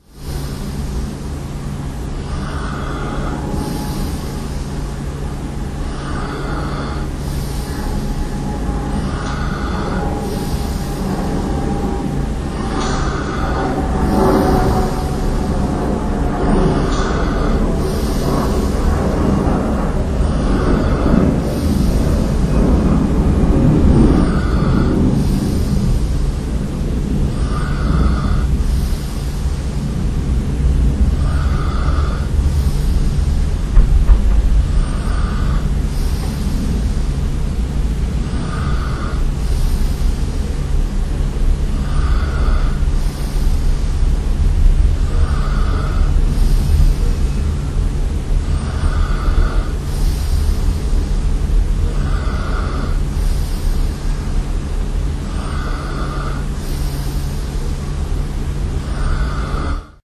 An Airplane that left Amsterdam Airport Schiphol a short while ago, passes me sleeping. I haven't heard it but my Olympus WS-100 registered it because I didn't switch it off when I fell asleep.